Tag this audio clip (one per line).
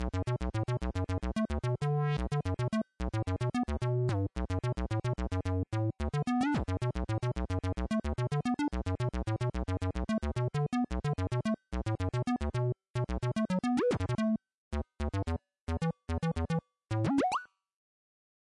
bass
bassline
braindance
electronica
free
idm